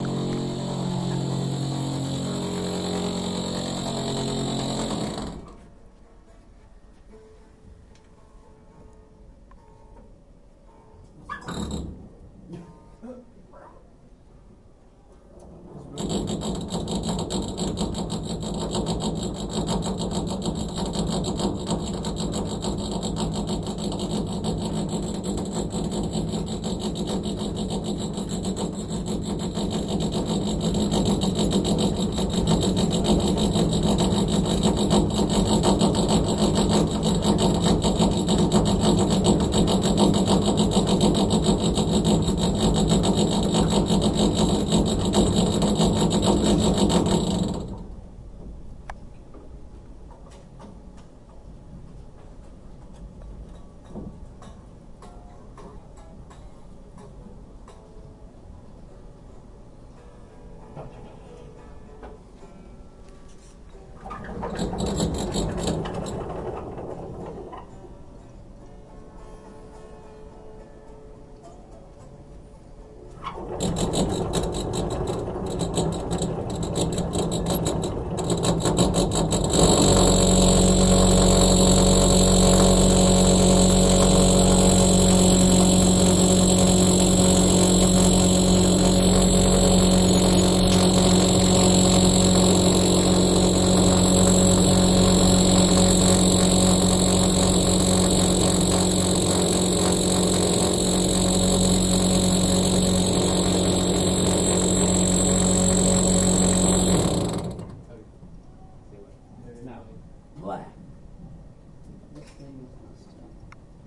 sounds of drilling through the carpeted classroom floor from the ceiling of the room below
cement, drill